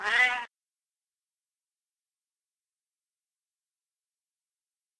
Siamese cat meow 6